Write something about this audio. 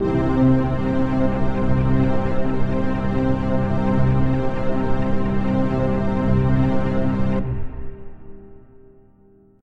String and Synth Pad
Created by layering strings, effects or samples. Attempted to use only C notes when layering. Strings with layered synth and bass.
String, Layered, Pad, Bass, Synth, Strings